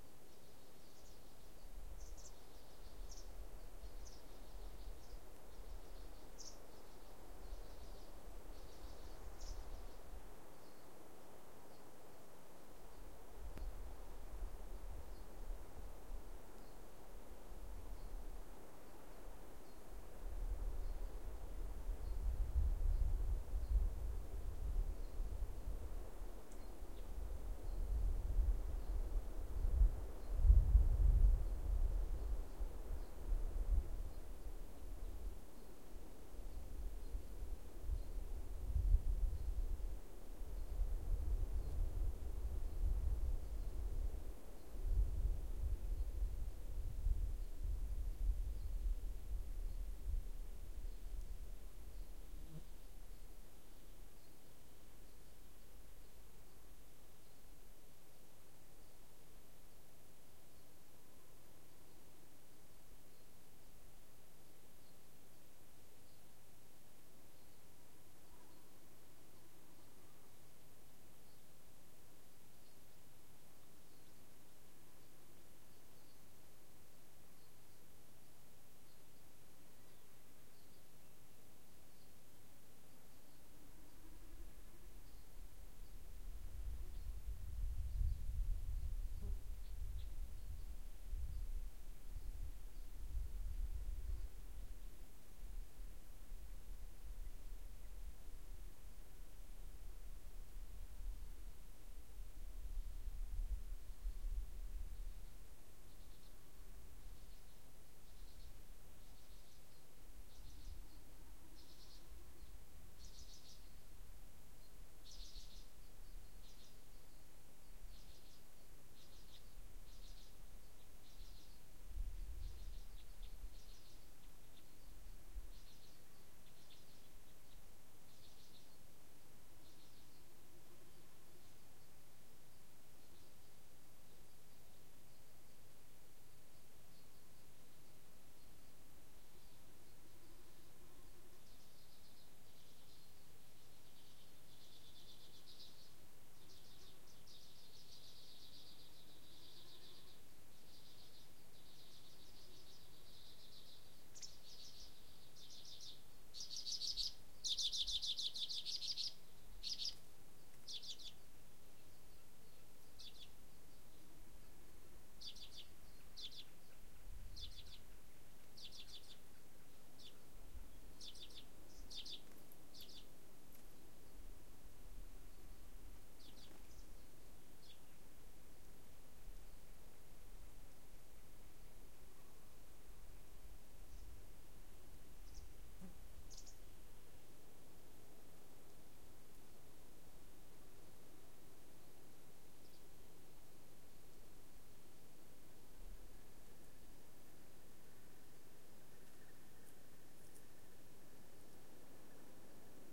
Atmos Country Open area in forest
Forest location in Iceland, birds, flies, wind in trees. Recorded in quadraphonic with a Zoom HN4 and a stereo condenser pair. Quiet atmos. Summer, late afternoon.